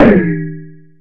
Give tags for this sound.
drum; percussion; industrial; metal; synthetic

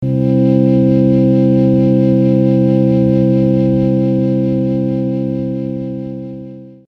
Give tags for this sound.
kaossilator2; electric; sound

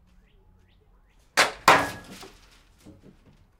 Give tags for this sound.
bang; puck; dryer; metal; hit; impact

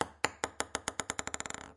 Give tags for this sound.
bizarre; compact; odd; ping; pong; rhythm; sounds; table

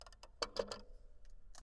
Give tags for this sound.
instrument; keyboard; note; piano; sample; samples; toy; toypiano

